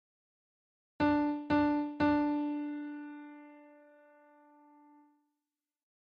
D Piano Sample